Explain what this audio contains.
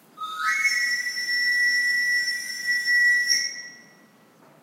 the whistle used by itinerant knife sharpeners in Seville (I use the plural, but wonder if there is just this one left...)
city, field-recording, south-spain, streetnoise, whistling